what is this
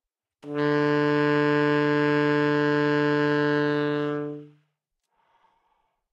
Sax Tenor - D3
Part of the Good-sounds dataset of monophonic instrumental sounds.
instrument::sax_tenor
note::D
octave::3
midi note::38
good-sounds-id::4971
D3, good-sounds, multisample, neumann-U87, sax, single-note, tenor